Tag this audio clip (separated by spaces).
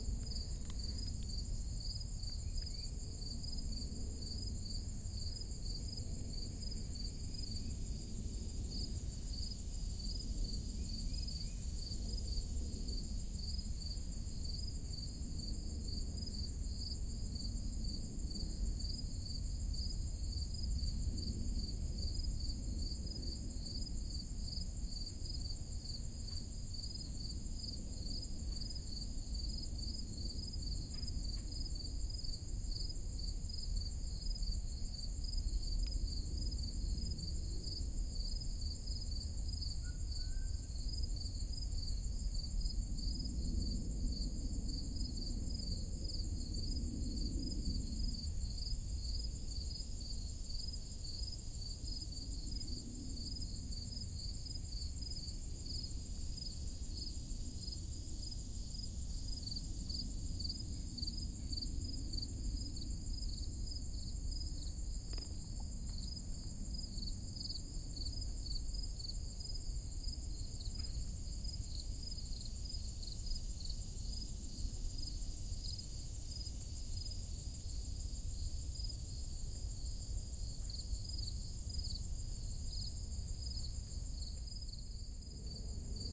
crickets day EM172 field-recording forest H1 insects morning nature outdoor Primo summer Zoom Zoom-H1